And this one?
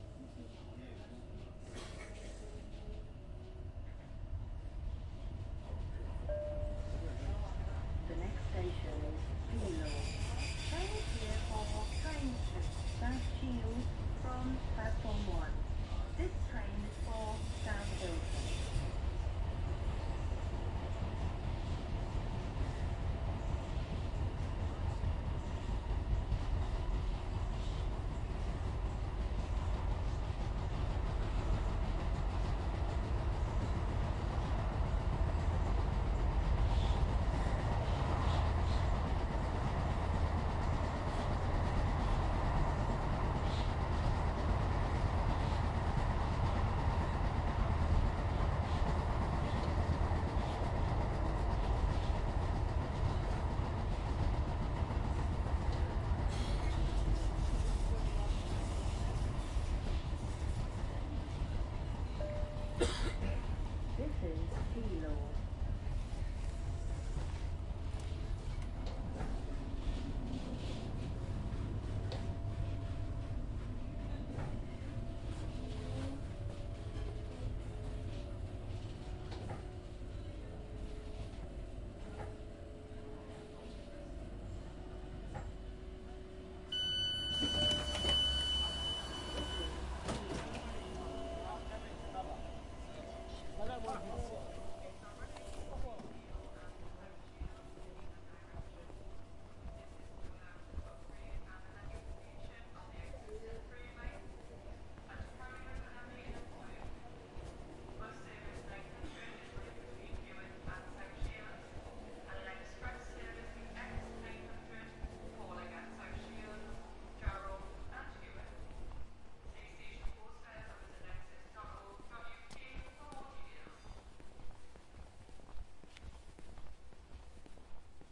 Interior>Exterior train arrives at overground station, get off and walk away, metro, underground, subway
overground, underground